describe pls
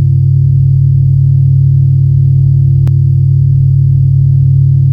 Created using spectral freezing max patch. Some may have pops and clicks or audible looping but shouldn't be hard to fix.
Soundscape, Still, Atmospheric, Sound-Effect, Background, Perpetual, Freeze, Everlasting